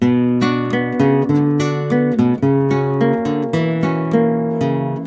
This will loop perfectly at 94.717 BPM. Flamenco guitarist.